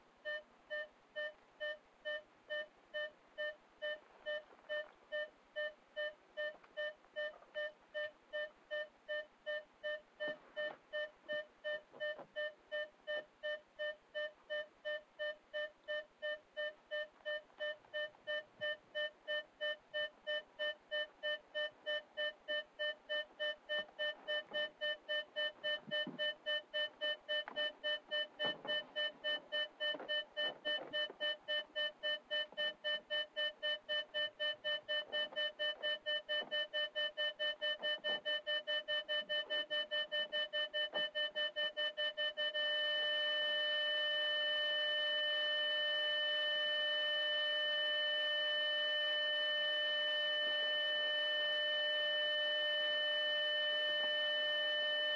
I drove my car into a wall; and recorded the proximity alert from it. I then washed it through audacity; slowed it 23%; and trimmed the edges. The result? -chilling.
A few indistinct gulps cool the atmosphere a little more. It's not the making of a corpse - but it sounds a bit like it?
Recorded late at night in my car by my home with the engine off in fog - so the sound is 'hollowed' by the atmosphere. Recorded using a Sennheiser MKE300 'shotgun' mic.
What a chilling way to go...?